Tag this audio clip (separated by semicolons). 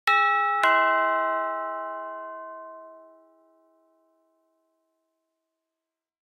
bell; doorbell; tubular-bell